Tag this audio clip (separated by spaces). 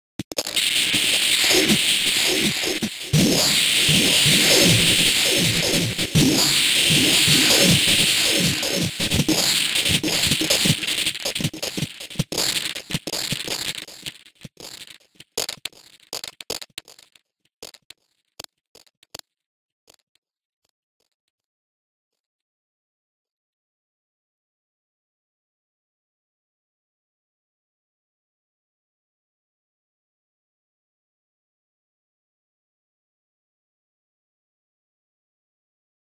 80bpm distortion noise remix